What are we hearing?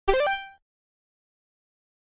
A retro reload video game sound effect.
game, reload, retro, video